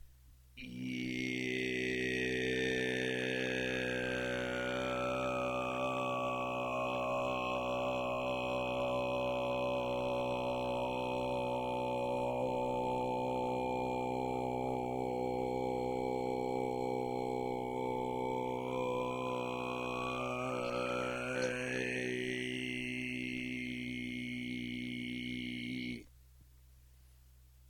Gyuto Voice 1 (63hz) natural flange (Broadcast wave format)
192, meditation, multi-phonic, throat-singing, tone, pure, multiphonic, voice, clean, solfeggio, 24, frequency, gyuto, toning, ambient, hz, khz, bit, raw